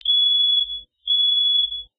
fire alarm beeping in the morning
A fire alarm beeping at 3:00 AM. It decided to beep, so I decided to publicize it and let the world hear it's voice. This was recorded in an apartment complex with the fire alarm just outside the door. It always does this at random parts of the day, and it's not that annoying but, it happens.